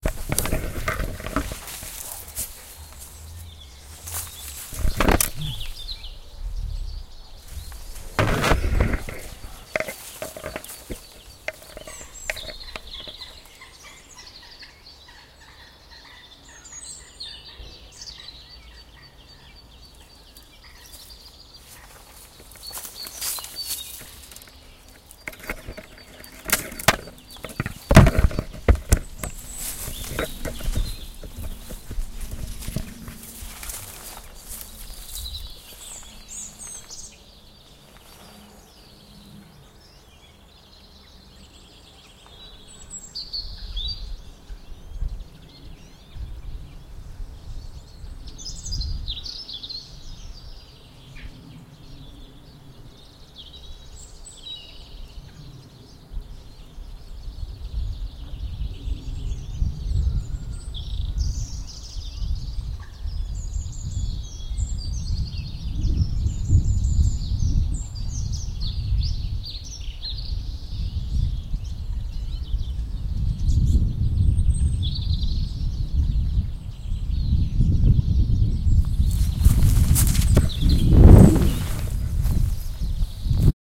Sound of birds in march at St Albans City.